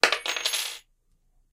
Metal Key Falling on Wood
A metal key falls on wood and bounces slightly with a ringing sound.
Recorded in stereo via a Blue Yeti and Audacity. I did a light noise-removal filter to get rid of computer fans in the background.